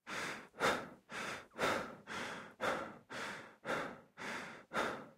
Male Breath Fast Loop Stereo
Fast Breath of a Human (Loop, Male).
Gears: Rode NT4
breath, breathing, human, loop, male, man, vocal, voice